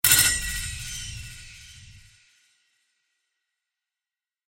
Parry SFX Idea
Some special sound effect for a move or something... Right